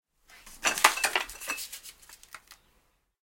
Bottles Scuttle
Some shuffling of bottles.
Animation, SFX, Clink, Prop, Bottles, Hit, Glass, Foley, Rustle, Cinematic